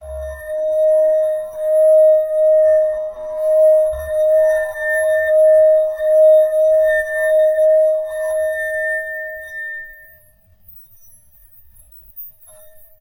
Tono Corto agudo 13sec
bohemia glass glasses wine flute violin jangle tinkle clank cling clang clink chink ring
clang, glass, jangle, clink, glasses, cling, wine, flute, bohemia, violin, tinkle, ring, chink, clank